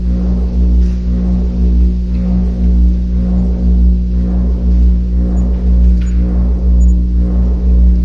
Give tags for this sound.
door; drone; hangar; iron; loop; low

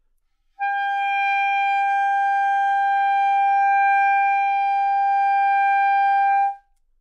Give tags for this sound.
good-sounds; neumann-U87; G5; multisample

